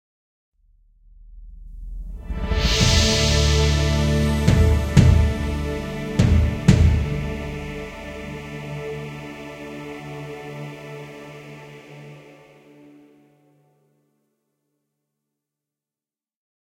Captain Sparrow
If you close your eyes, can you hear a pirate ship passing by?
Four different sounds of the Roland JV 1080, two sounds of different Kontakt-Libraries and one sound of the BS Engine used.
jingle, open-sea, pirates, fanfare, big-picture, film, film-title, movie, fantastic, cinematic, empire, majestic